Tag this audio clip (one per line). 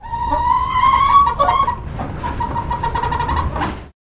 animal
chickens
clucking
chicken